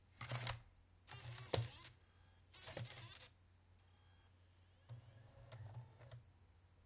Insert CD into Laptop
CD, Computer, DVD, Drive, H1, Laptop, Recording, Stereo, Zoom